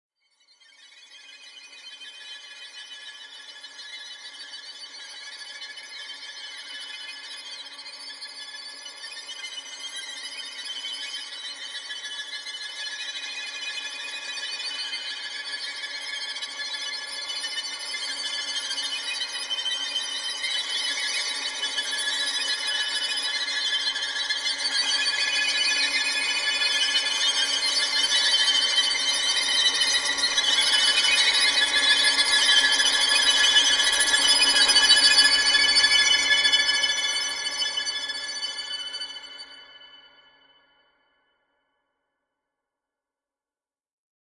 Horror, Violin Tremolo Cluster, B
An example of how you might credit is by putting this in the description/credits:
And for more awesome sounds, do please check out my sound libraries or SFX store.
The sound was recorded using a "H1 Zoom recorder".
Originally recorded and edited using Cubase with the Proximity plugin on 12th December 2016.
cluster, horror, ponticello, scary, shriek, string, strings, violin, violins